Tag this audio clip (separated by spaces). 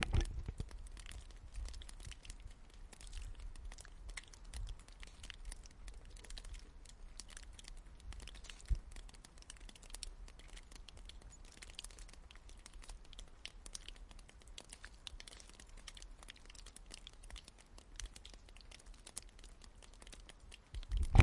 Drop Drops falling Field-recording Forest Nature Peaceful Rain Splash Tap Water